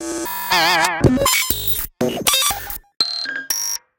WonkTone 120bpm05 LoopCache AbstractPercussion
Abstract Percussion Loop made from field recorded found sounds
Abstract, Loop, Percussion